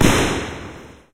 single explosive sound with some reverberations.
bang, explosion, plop, pwoom